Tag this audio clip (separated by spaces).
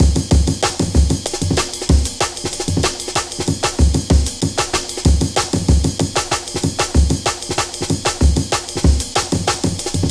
drum beats amen breaks loops